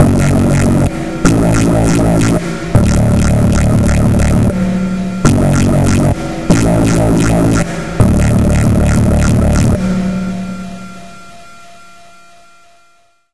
Dark step synth 01
Synth made in caustic 3 on android.